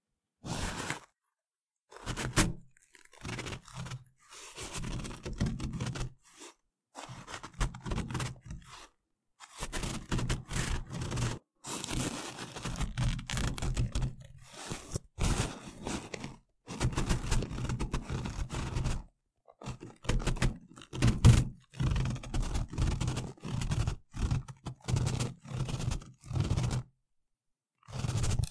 Pasos sobre la nieve
caminando, crack, crunch, footstep, ice, nieve, pasos, snow, snowy, step, walking, winter